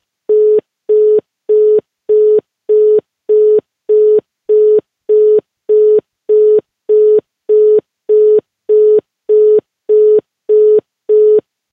Call busy/interrupted.
Recorded with Zoom H1.
busy, call, card, esposende, interrupted, mobile, operator, phone, portugal, portuguese, sound, tmn, tone, vodafone